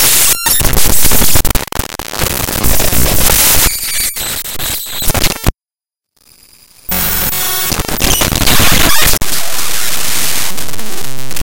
ig4icd32
created by importing raw data into sony sound forge and then re-exporting as an audio file.
glitches, harsh